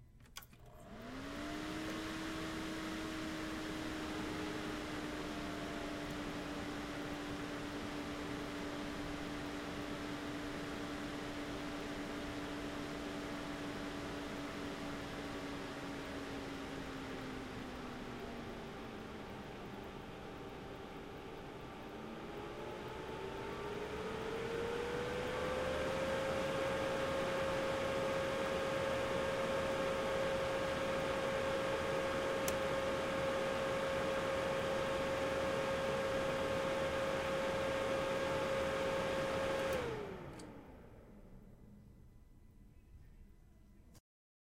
Computer Turn on:off
A computer with a loud fan turning on, running for a bit, then turning off.